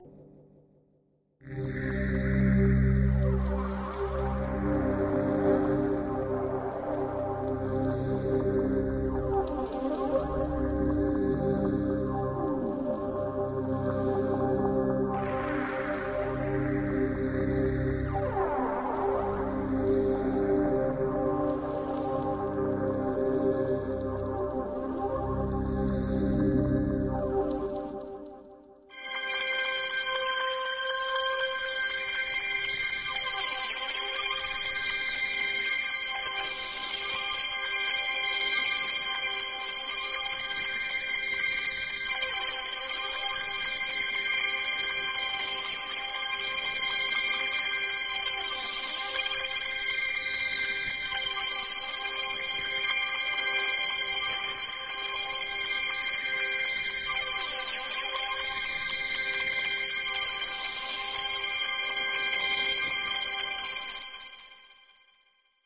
calm beach
A softsynth pad that evokes tropical waves